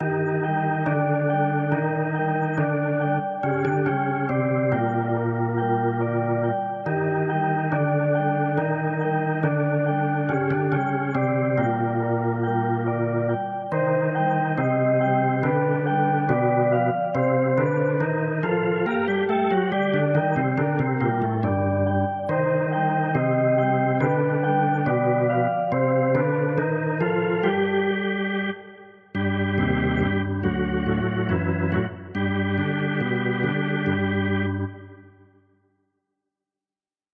Spooky Place
Some spooky music which could be used for a game or a weird content video.
weird, spooky, terror, scary, gameplay, haunted, play, terrifying, creepy, gaming